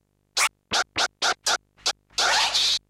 Scratch Fresh 9 - 1 bar - 83 BPM (swing)
Acid-sized sample of a scratch made by me. Ready for drag'n'drop music production software.
I recommend you that, if you are going to use it in a track with a different BPM, you change the speed of this sample (like modifying the pitch in a turntable), not just the duration keeping the tone.
Turntable: Vestax PDX-2000MKII Pro
Mixer: Stanton SA.3
Digital system: Rane SL1 (Serato Scratch Live)
Sound card on the PC: M-Audio Audiophile 2496 (sound recorded via analog RCA input)
Recording software: Audacity
Edition software: MAGIX Music Maker 5 / Adobe Audition CS6 (maybe not used)
Scratch sound from a free-royalty scratch sound pack (with lots of classic hip-hop sounds).
90, acid-sized, classic, dj, golden-era, hip-hop, hiphop, rap, s, scratch, scratches, scratching, turntable